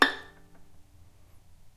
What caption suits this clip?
violin pizzicato vibrato